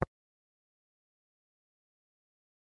A oneshot, "tap."
tick, type